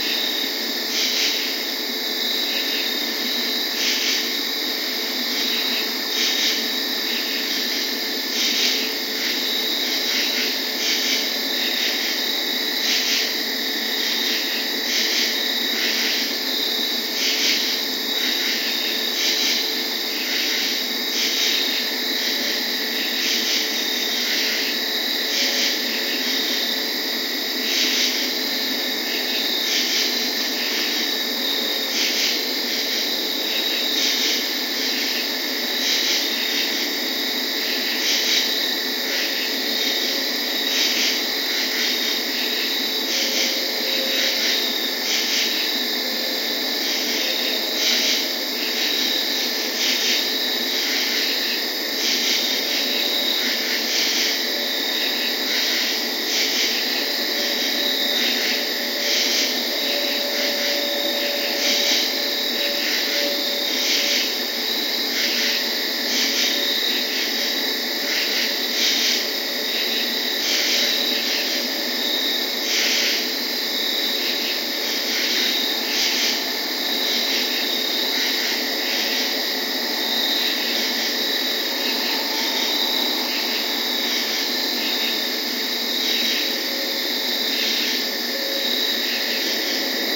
Nighttime ambiance recorded at 2 a.m. in my backyard.